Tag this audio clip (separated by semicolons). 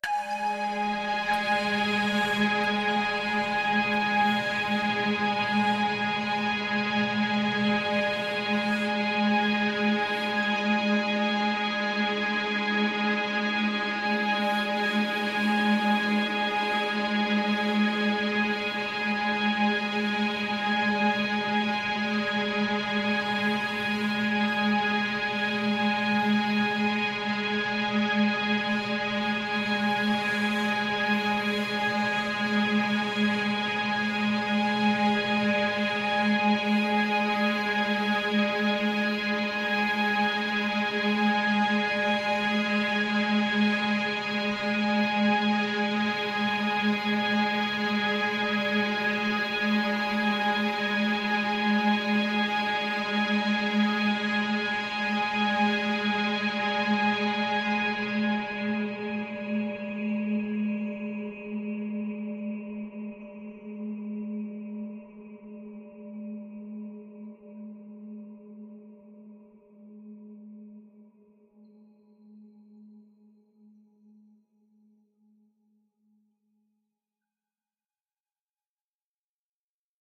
multisample; pad; drone; artificial; organ; soundscape